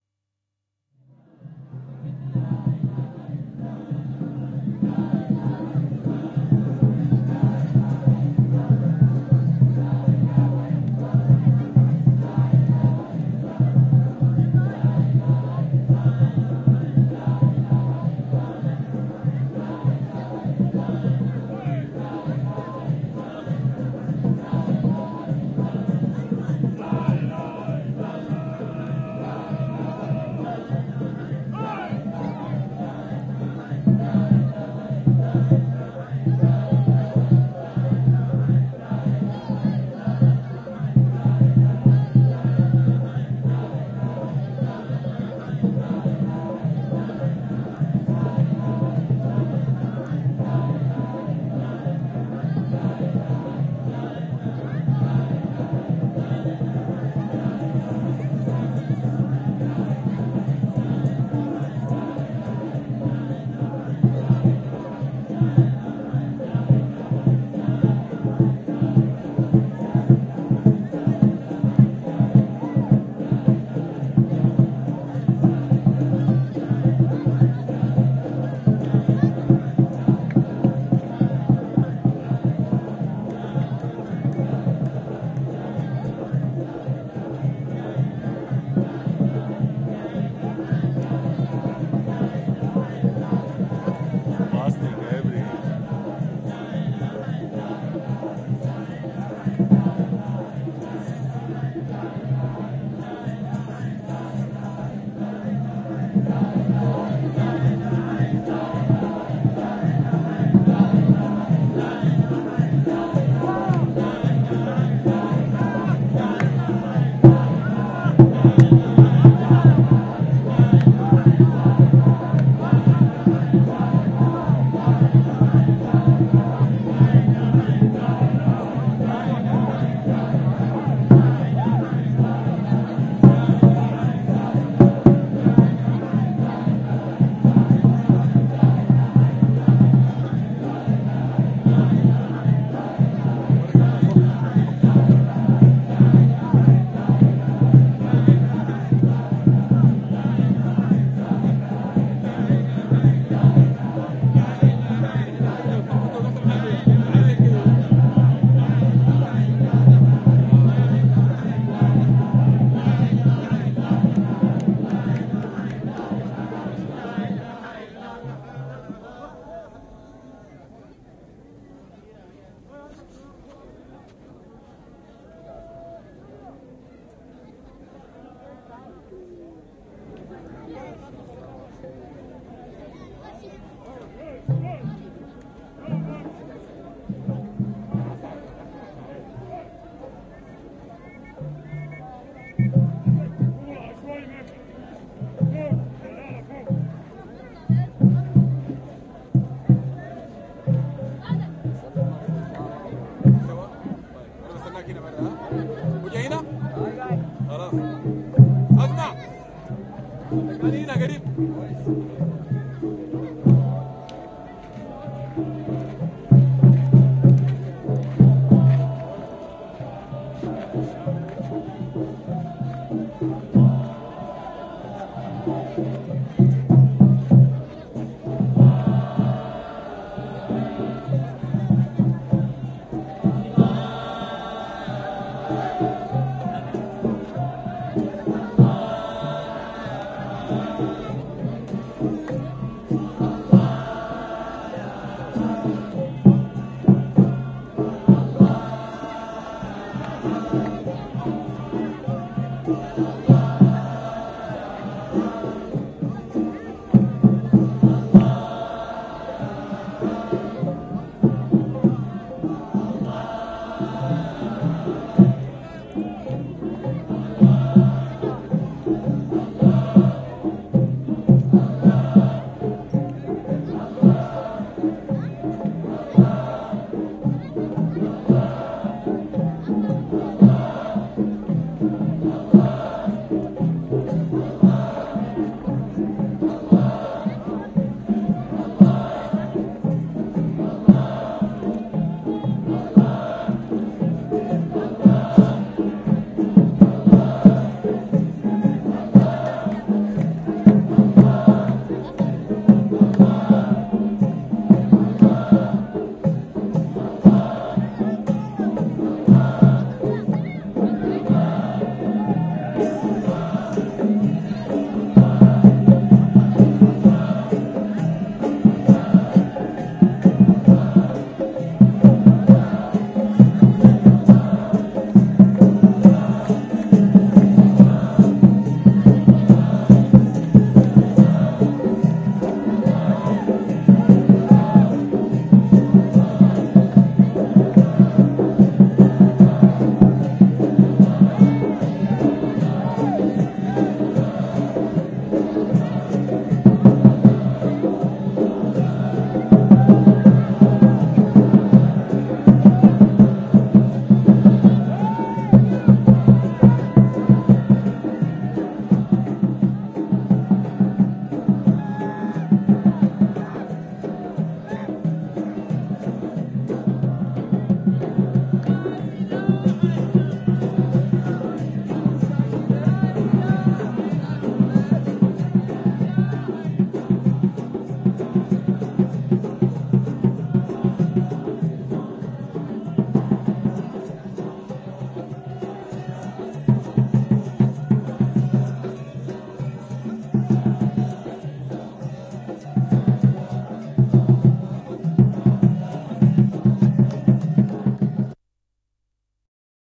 Sudan soufi chant & dance in Omdurman
Mono. Recorded with basic Uher tape-recorder, in Sudan (by this time, in 1997).
Close to Khartoum, Omdurman. Every Friday, sufi are singing and dancing in large circles.
Omdurman, sufi, chant, Sudan, religious, religion